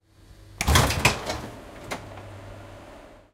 Tilt Train Door Open 2A
Recording of a pneumatic door opening on a tilt train.
Recorded using the Zoom H6 XY module.